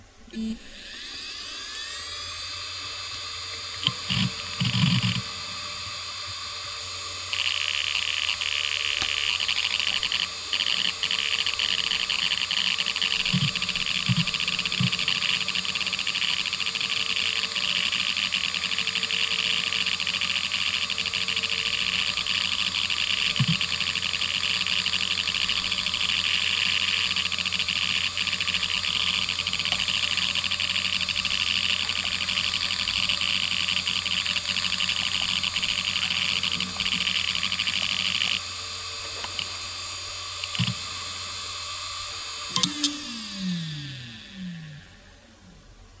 Seagate Medalist 4321 - 5400rpm - BB
A Seagate hard drive manufactured in 1998 close up; spin up, writing, spin down. (st34321a)
disk, drive, hard, hdd, machine, motor, rattle